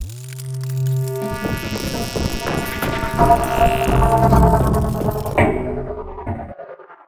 A mishmash of Transformers-esque sounds, made mostly by manipulating samples in Ableton.
electromechanics, electronics, robot, robotics, science-fiction, sci-fi, sfx, synthetic, transformer